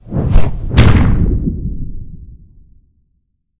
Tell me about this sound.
You hit a monster with the Encyclopedia Mortis! A woosh sound and a dull, heavy thunk.
From my short, free, artistic monster game.